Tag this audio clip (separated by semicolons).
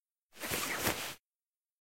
clothes; clothing; fabric; jacket; movement; moving; rustle; rustling; textile